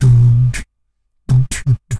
Beatbox 01 Loop 015b DaBoom@120bpm
Beatboxing recorded with a cheap webmic in Ableton Live and edited with Audacity.
The webmic was so noisy and was picking up he sounds from the laptop fan that I decided to use a noise gate.
This is a cheesy beat at 120bpm with a big boom kick.
Several takes and variations. All slightly different.
beatbox, boomy, loop, boom, Dare-19, bass, bassdrum, 120-bpm, kick, noise-gate, rhythm